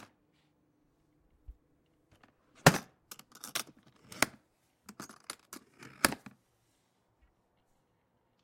Closing a tool box
box, click-clack